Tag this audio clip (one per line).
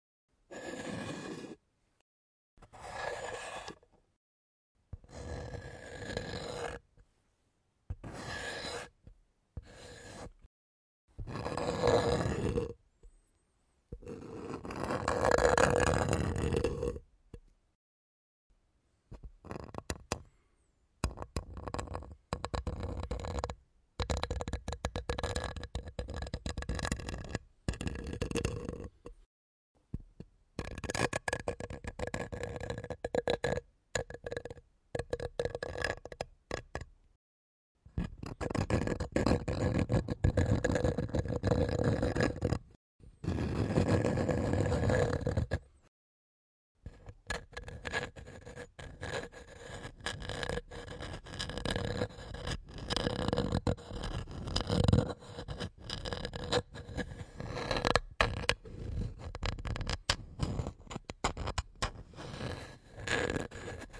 u
madera
rascar
wood
scrap